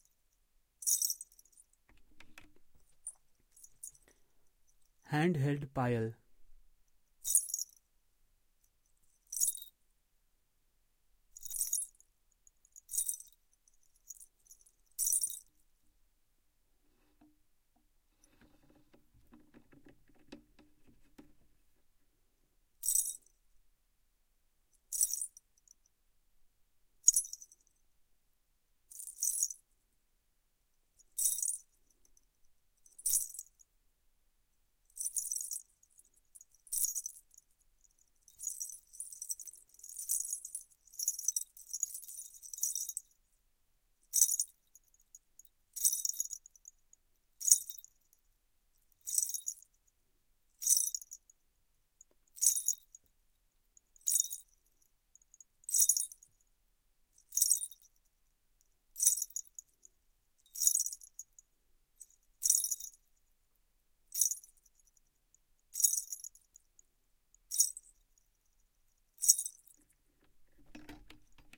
Payal Anklet Jewelery2
Some jewellery sounds
Payal, Anklet, Jewellery